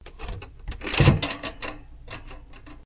Bathroom scale1
scale, body, human, medical